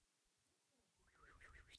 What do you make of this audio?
Knife Twirl
Knife blade whistling through the air
blade, knife, whistle